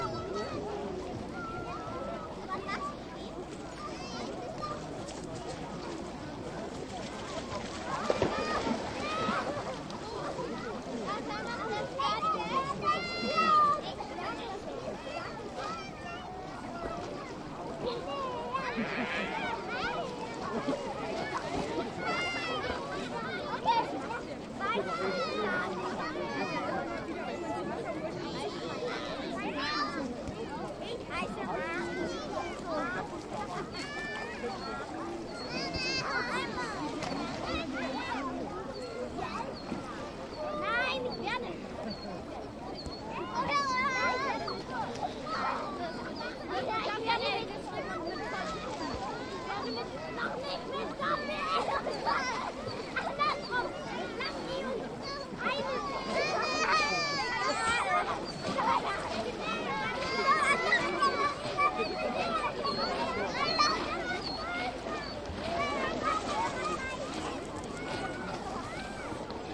beach atmosphere [Leipzig - Germany, Cospudener See]
The beach at the northern side of Lake Cospuden in Leipzig at the end of August. A lot of playing kids in the water, yeling and screamiomg and playing. Older persons sitting in the back...
Recorded with Zoom H2 in 4 channels.
beach, water, shouting, yelling, kids, kid, playing, public, children, screaming, playground, scream, play, yell